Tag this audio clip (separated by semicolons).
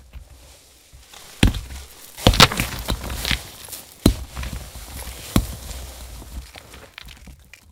rustle; fall; leaf; rocks